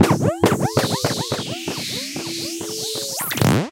A short sfx generated on a modular